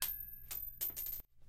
coin falls and hits the floor
ground, fall, coin